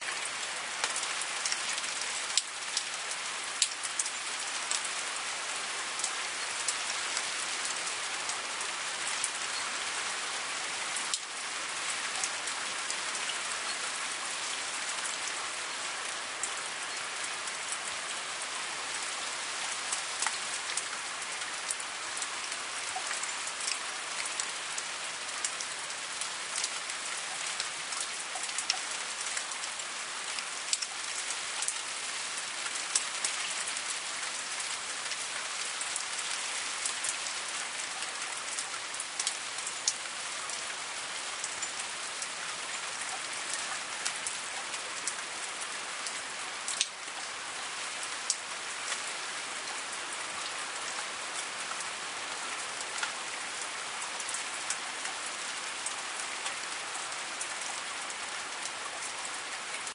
rain april 2014
a recording of a much needed rain in drought stricken California USA April 1 2014. 1 min.